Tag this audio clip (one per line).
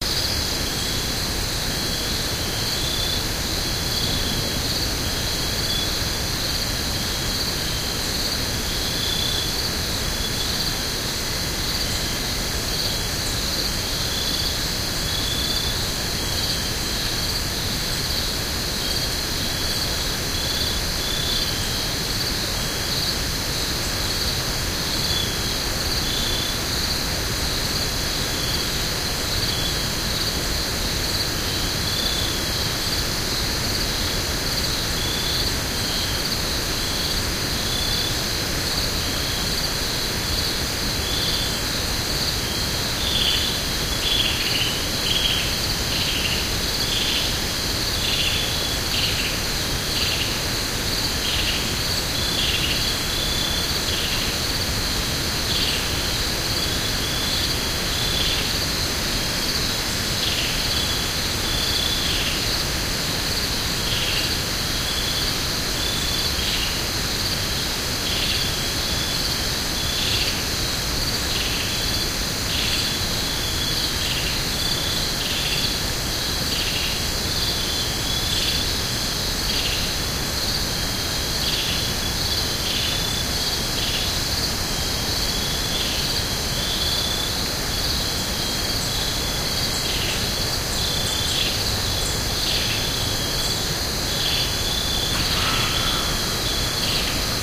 west-virginia
ambiance
bird
field-recording
unedited